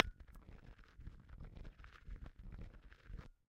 Two small glass holiday ornaments being rubbed together. Low noisy sound. Fair amount of background noise due to gain needed to capture such a soft sound. Close miked with Rode NT-5s in X-Y configuration. Trimmed, DC removed, and normalized to -6 dB.